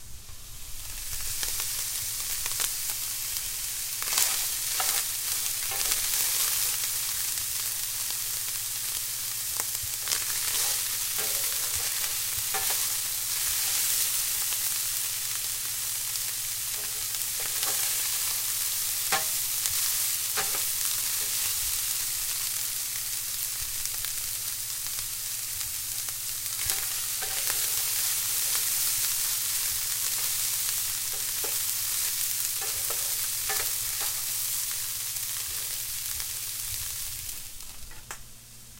Cooking Steak
I'm just cooking a very delicious new york steak. It was very good, I assure you.